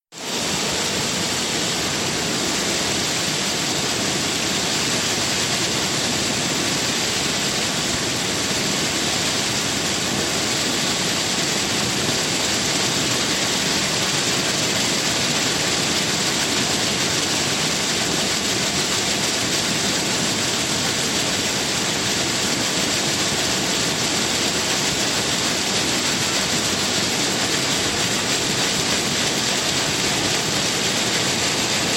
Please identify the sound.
The sound of Copper Creek Falls at Disney's Wilderness Lodge